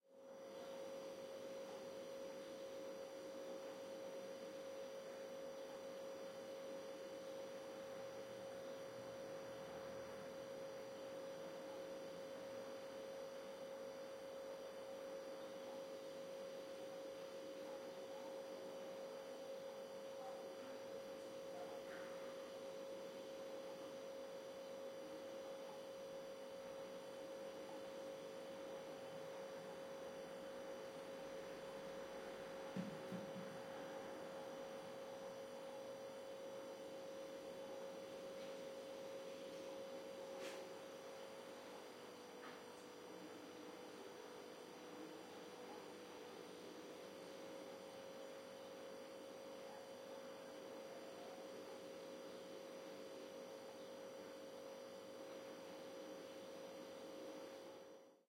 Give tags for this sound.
hum soundscape surround refrigerator fridge kitchen surround-sound background

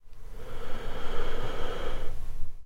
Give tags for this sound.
breath-in male

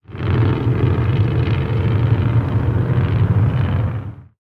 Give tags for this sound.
brakes city oregon pdx portland sound sounds soundscape truck

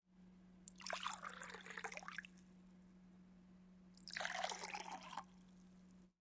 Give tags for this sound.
effect foley sfx stereo water

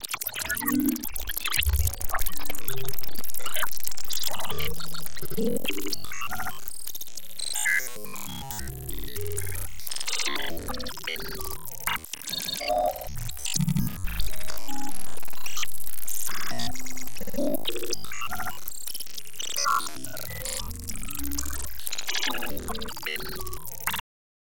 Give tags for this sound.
glitch transpose

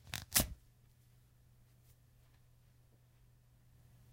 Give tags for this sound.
flesh rip tear

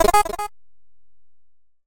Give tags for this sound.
FX Sounds sound-desing

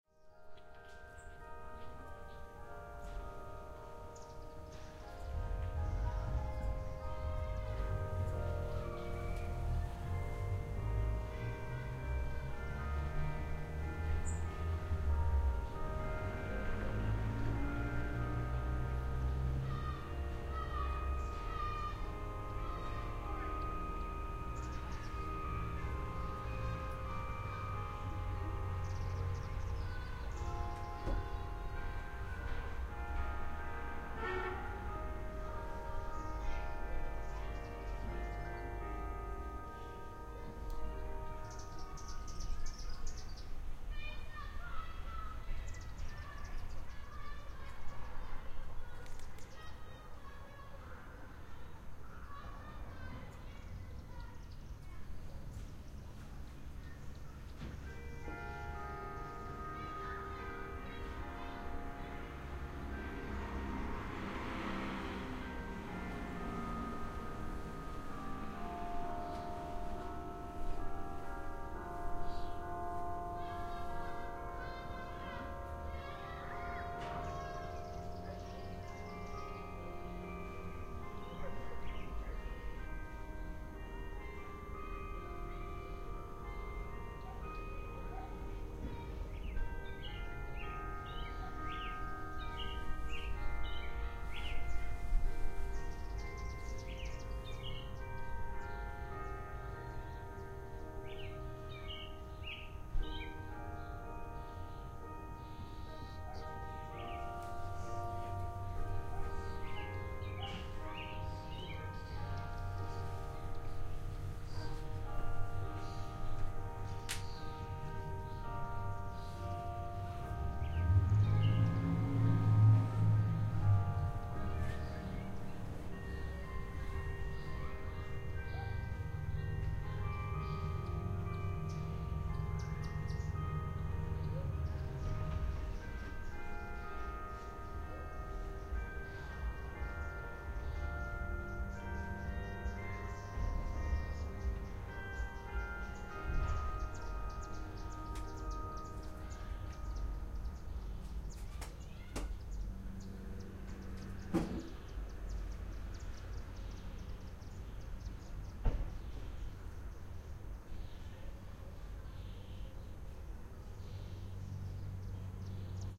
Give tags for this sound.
cars people

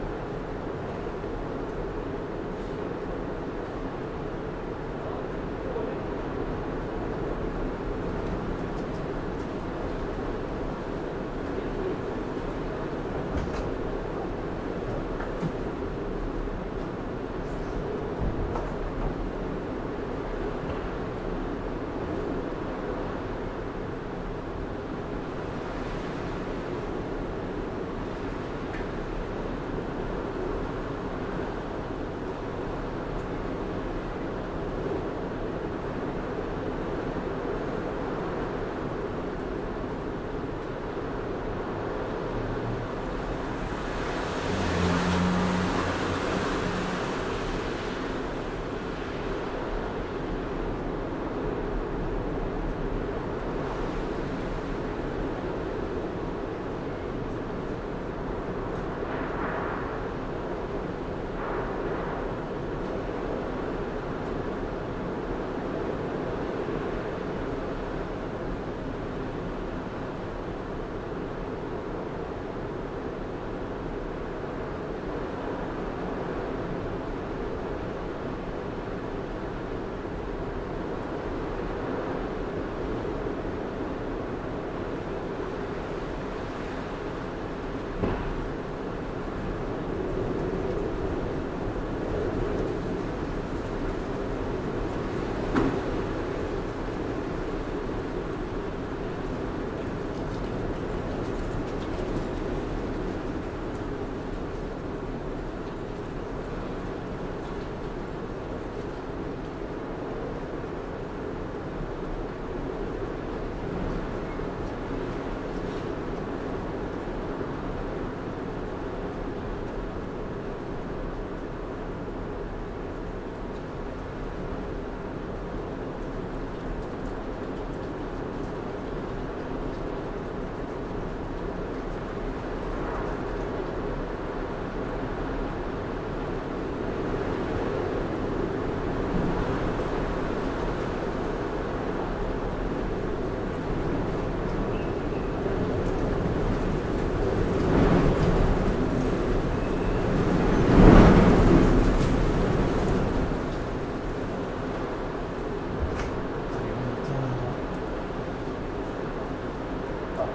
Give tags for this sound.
storm wind woosh

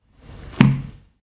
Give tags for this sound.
book household lofi loop noise paper percussive

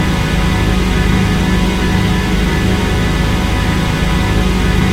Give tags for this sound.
Background Perpetual Still Sound-Effect Soundscape Freeze Everlasting Atmospheric

